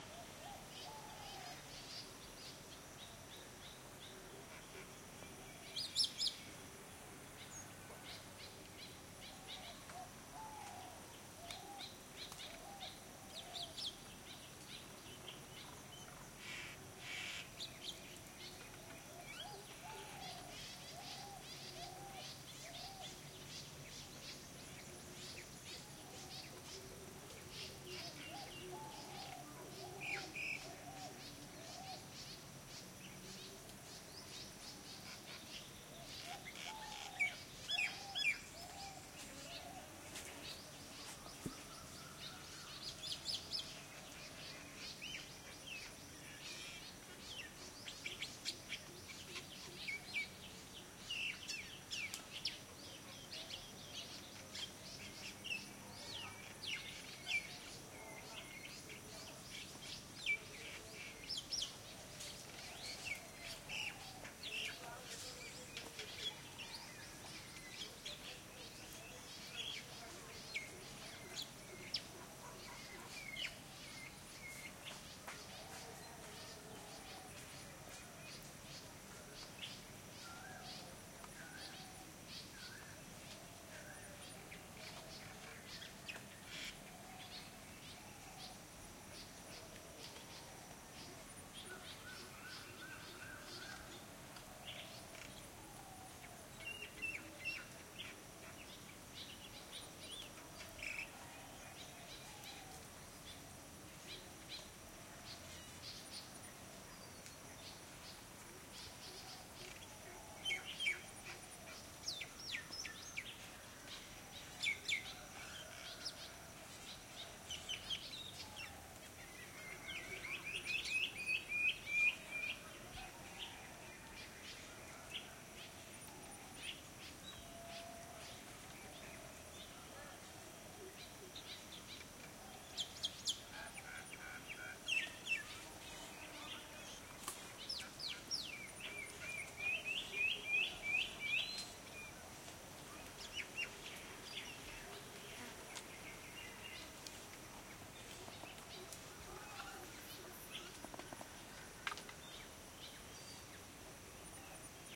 crickets and tropical birds village or backyard day like after rain India
after, backyard, birds, crickets, day, India, like, or, rain, tropical, village